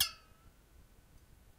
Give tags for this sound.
percussion metallic experimental

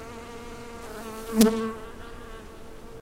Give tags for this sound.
insects winter field-recording

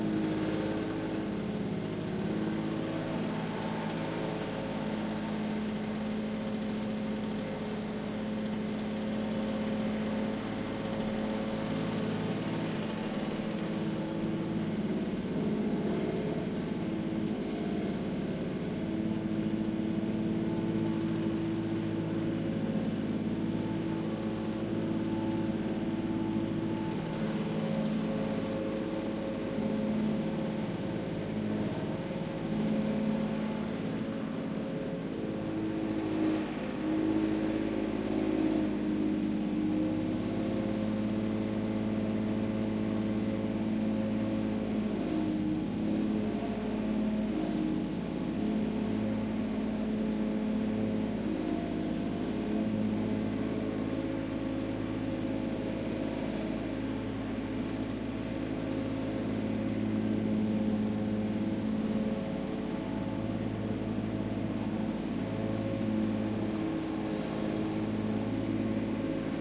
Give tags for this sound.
4-stroke engine field-recording garden grass-cutting housework lawn-mower motor suburban weekend

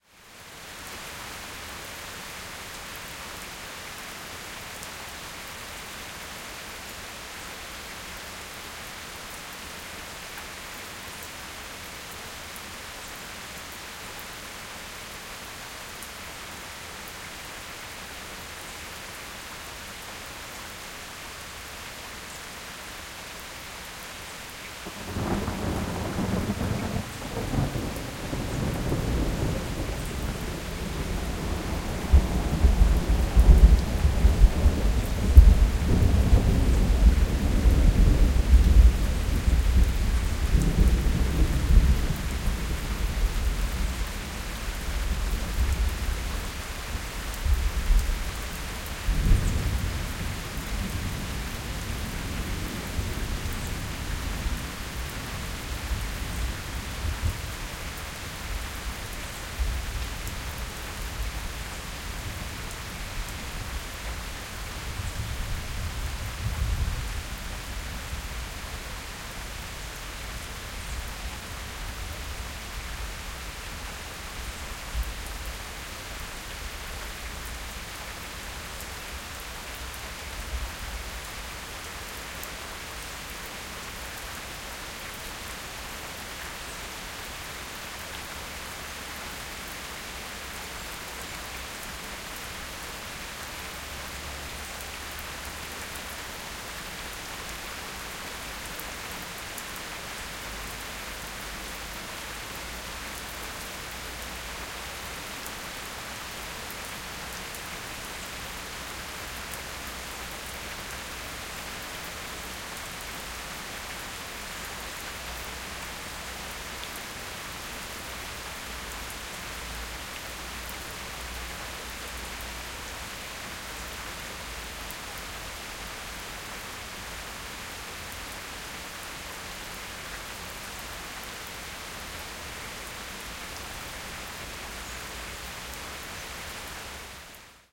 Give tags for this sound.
lightning nature rain thunder field-recording storm thunderstorm weather

